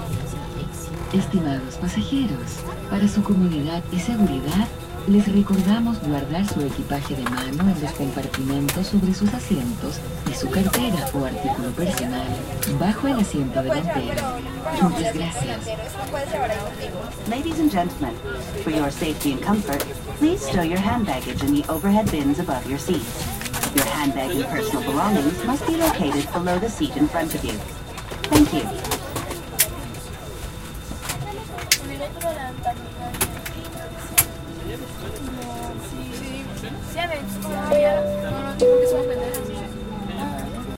In the airplane
Just sitting on the airplane, waiting for everyone to buckle up and go.
announcement, passengers